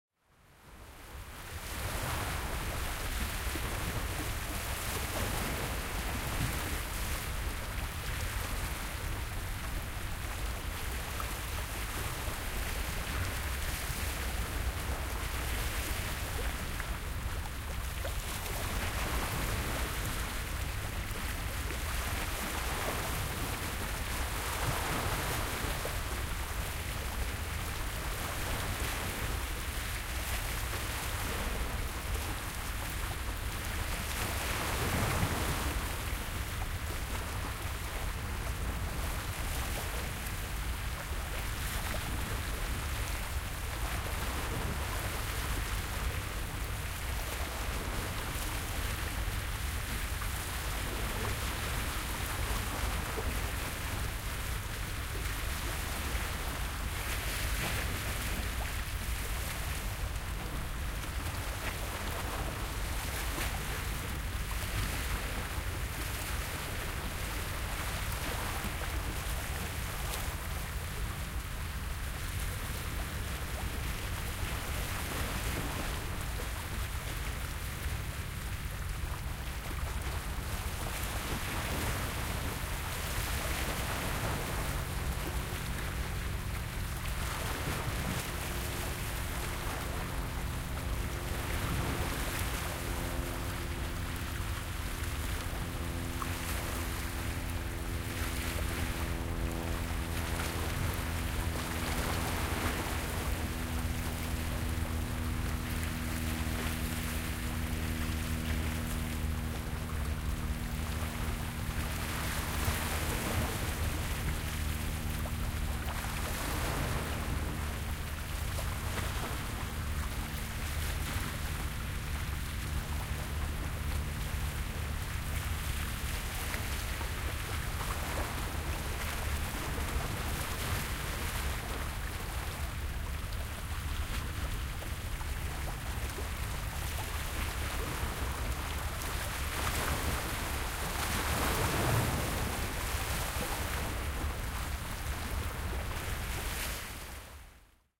sample pack.
The three samples in this series were recorded simultaneously (from
approximately the same position) with three different standard stereo
microphone arrangements: mid-side (mixed into standard A-B), with a
Jecklin disk, and with a Crown SASS-P quasi-binaural PZM system. To
facilitate comparison, no EQ or other filtering (except
level normalization and mid-side decoding, as needed) has been applied.
The 2'28" recordings capture small, choppy waves breaking against the
rocky shore of the San Francisco Bay at Cesar Chavez Park in
Berkeley, CA (USA) on October 1, 2006. The microphones were positioned
approximately 6 feet (2 meters) from the Bay's edge, oriented toward the
water. A small airplane flying overhead becomes audible at about one minute into the recording. This recording was made with a Crown PZM SASS-P-MKII quasi-binaural
"artificial head" microphone (with the standard windcover)